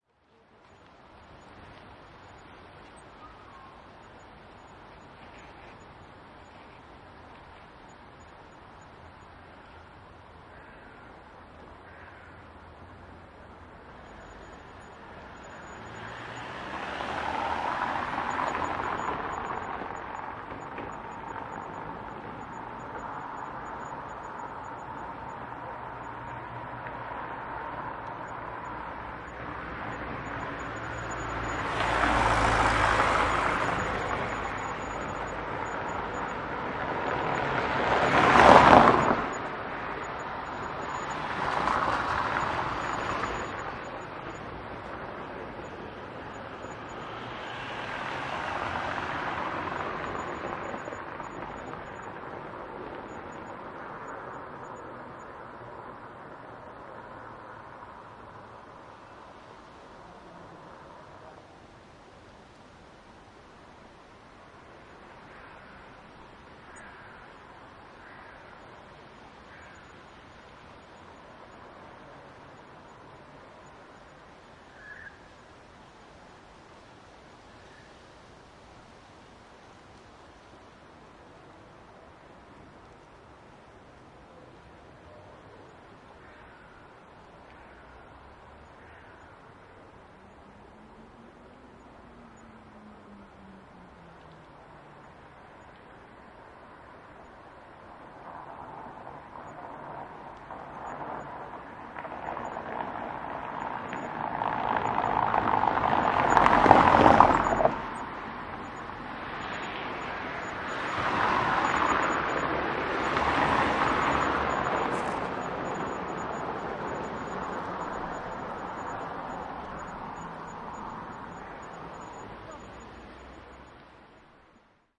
hi-fi szczepin 01092013 cobbled poznanska street
01.09.2013: fieldrecording made during Hi-fi Szczepin. Performative sound workshop which I conducted for Contemporary Museum in Wroclaw. Sound of car passing by cobbled Poznanska street in district Szczepin in Wroclaw. Recording made by one of workshop participant.
car, cobbled-road, cobbles, field-recording, Poland, street, Szczepin, Wroclaw